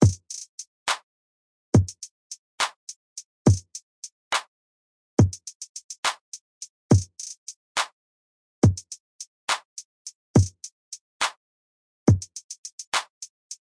Hip-Hop Drum Loop - 209bpm
Hip-hop drum loop at 209bpm